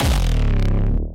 Hardcore Kick 2 (180 BPM optimized)
A variation of Hardtslye Kick 10, optimized for 180 BPM. I would love to get link to your work.